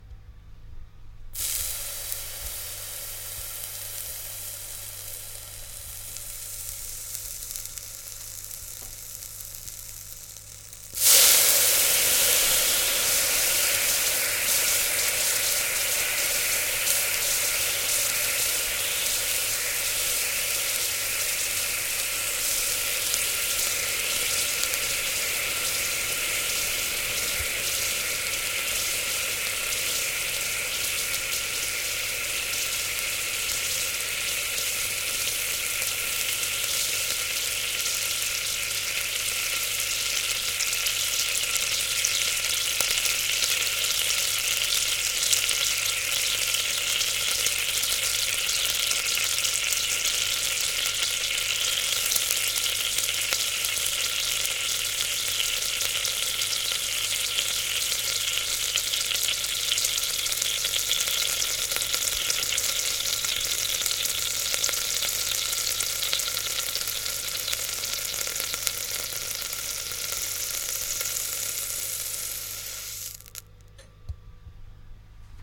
Water evaporating once dropped onto a hot pan - take 3.